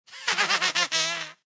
A small monster voice